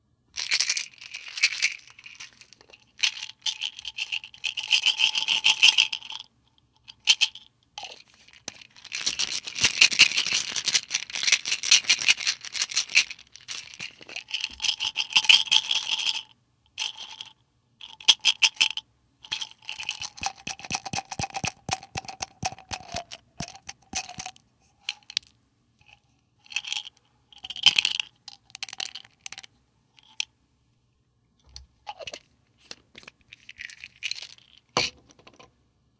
Pill Bottle & Pills
Pill bottle opened, pills emptied, put back inside, and bottle closed.